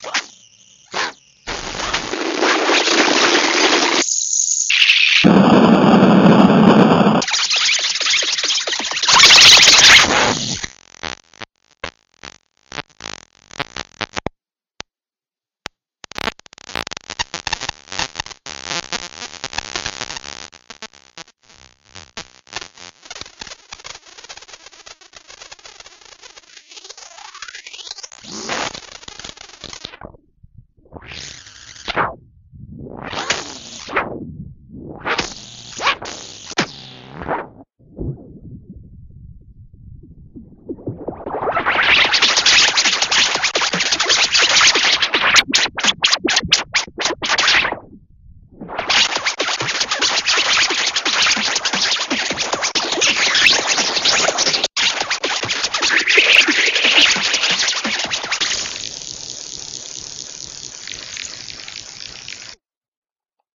big bug bent
circuit, bug, bending